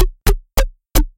Synth Plungers
A few short plunger-like noises I generated with hihats and a lot of heavy ring mod processing. Enjoy!
Ring, Synthesized, Short, Plunger, Synth, Mod, Fake